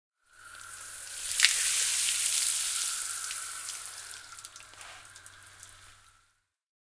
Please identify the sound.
water sea spray E03
A hose spray nozzle spraying while passing the mic. Can be used as sweetener for sea spray hitting the deck of a ship.
hose, sea-spray